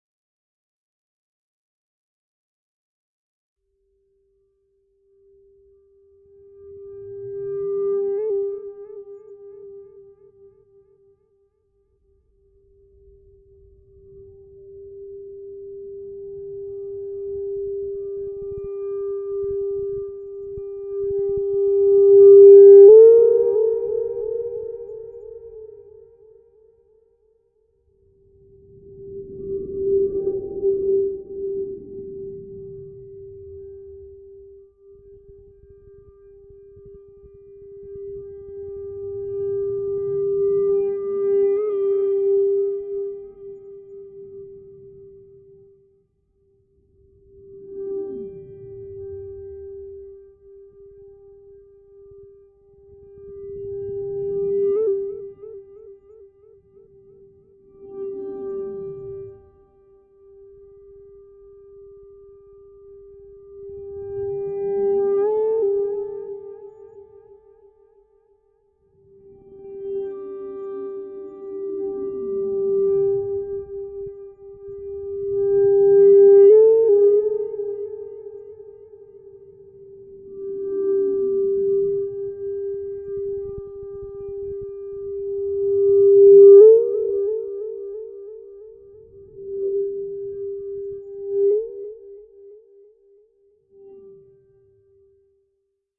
signal sound for a dark soundscape